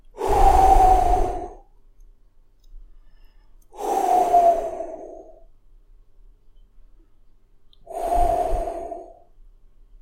blowing smoke 3
The sound of slowly exhaling smoke or blowing on hot food.
blow, blowing, exhale, exhaling, smoke